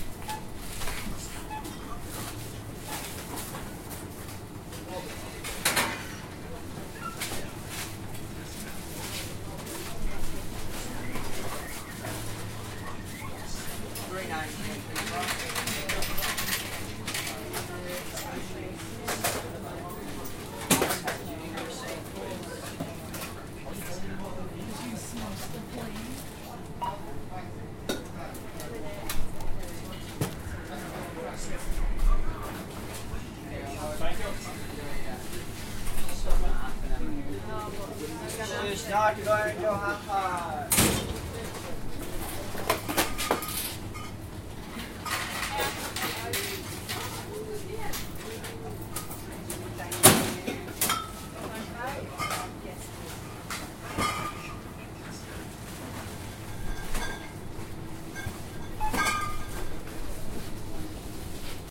It's a recording about Tesco.